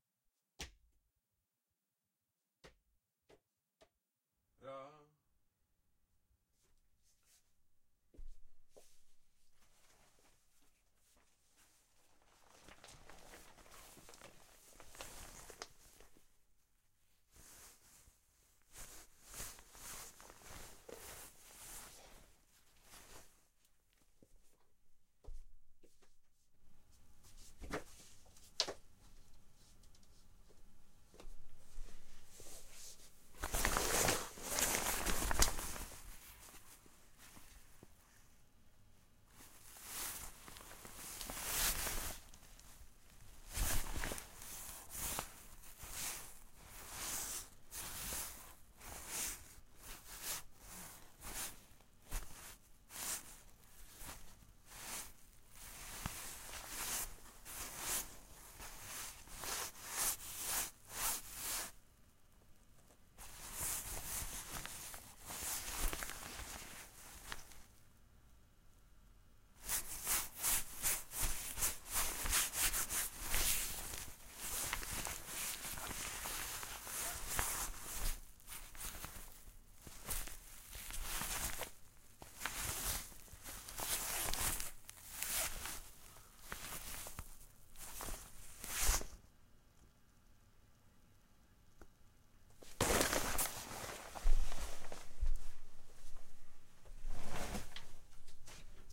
The movement in pants for winter.